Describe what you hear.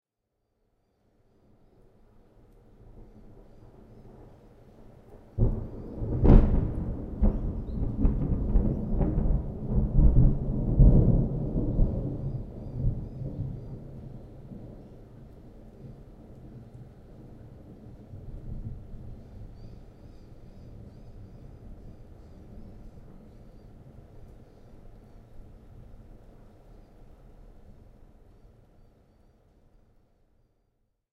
A distant, ominous lightning strike.
Recorded with a Zoom H1.
dark, distant, lightning, loud, ominous, thunder